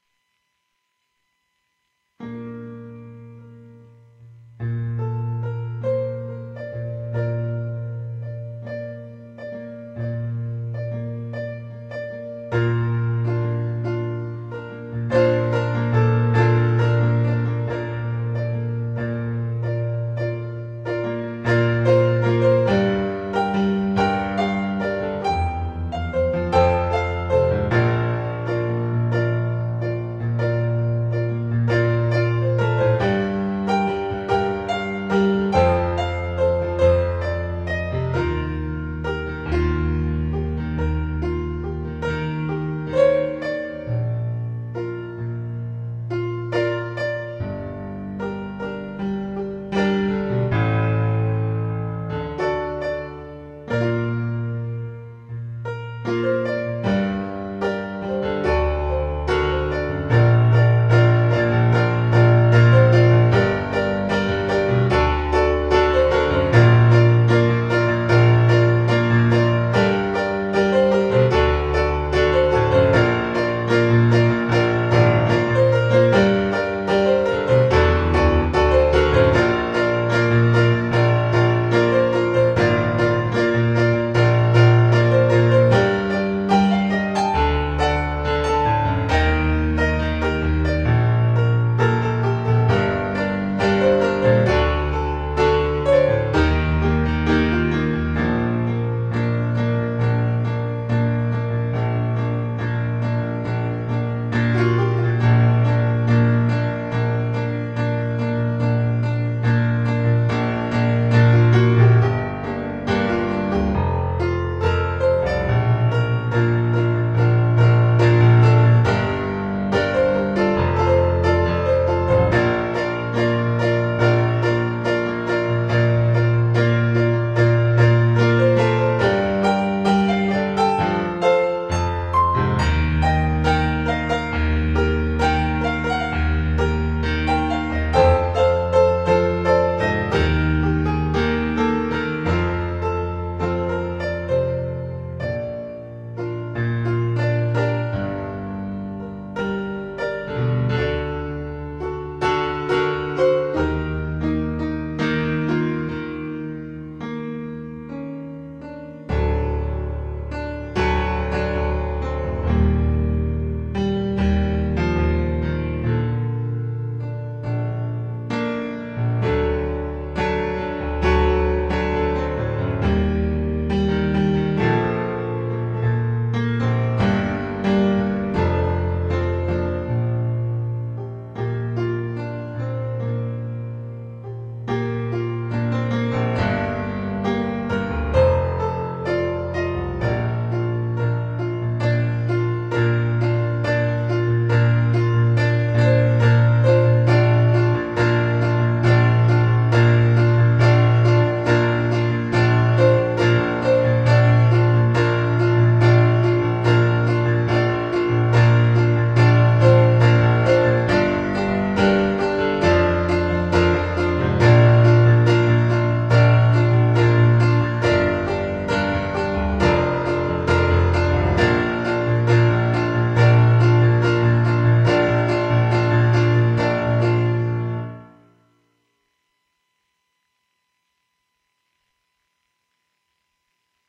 piano improvA 2 27 2011
Recorded using an Alesis QS8 keyboard using a direct signal. This sound file is unedited so you will most likely hear mistakes or musical nonsense. This sound file is not a performance but rather a practice session that have been recorded for later listening and reference. This soundfile attempts to pertain to one theme, as some of the older files can be very random. Thank you for listening.